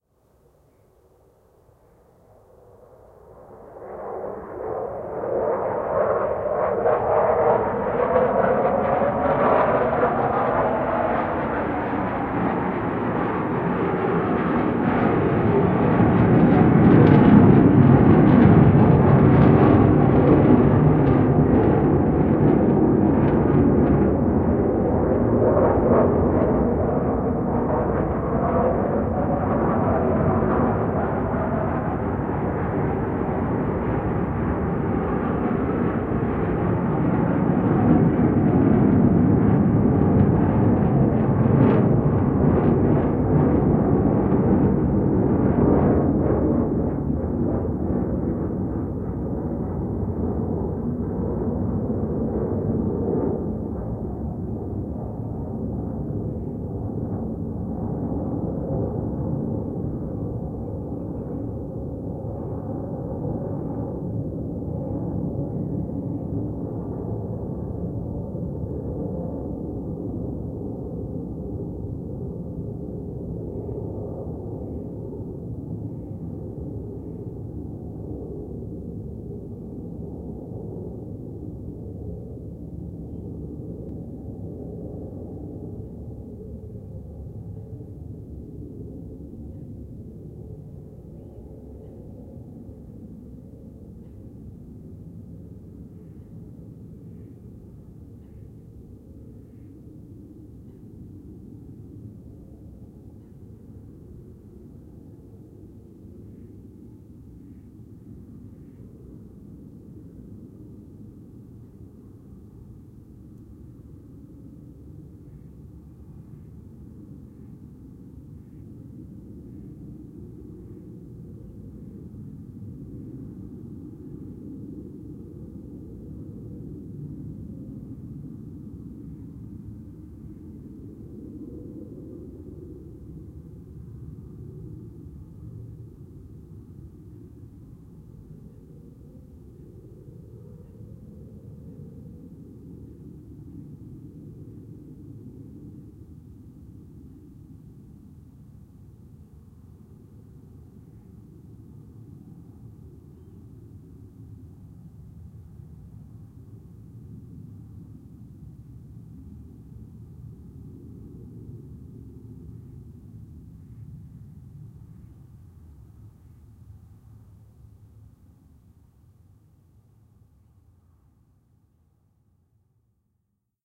2 Royal Dutch Airforce F-16 Fighting Falcons flyby
2 Royal Netherlands Air Force (RNLAF) F-16 Fighting Falcon fighter jets taking off from Leeuwarden Airforce base.
Recorded with a Tascam DR-05 Linear PCM recorder.